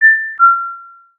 short FM generated tones with a percussive envelope